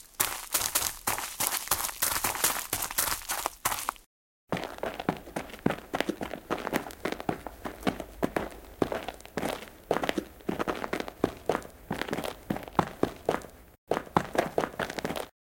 Running foley performance 1
dead-season, fast, foley, reverberant, run, shoe, walk